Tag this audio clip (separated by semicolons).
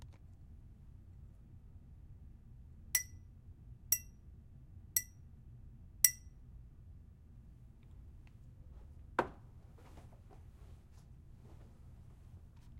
tap; fork; glass